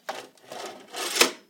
Tools-Step Ladder-Metal-Unfold-03
The sound of a metal step ladder being unfolded and set down.
impact, ladder